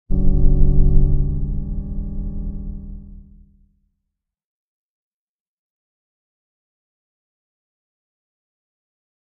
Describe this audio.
A soft organ sting.
atmosphere, chord, melodic, music, musical, organ, stab, stabs, sting, transition